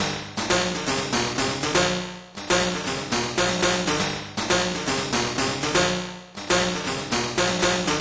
A kind of loop or something like, recorded from broken Medeli M30 synth, warped in Ableton.